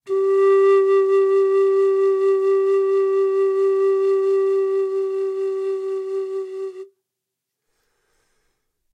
long tone vibrato pan pipe G1
g1, pan